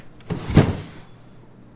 Window ledge opening
heavy, ledge, opening, window, wood